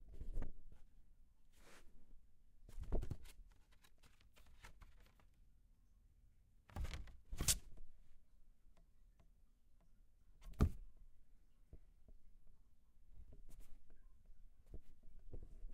FX Record Player02
More record player sounds.